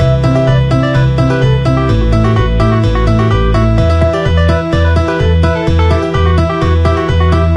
A music loop to be used in storydriven and reflective games with puzzle and philosophical elements.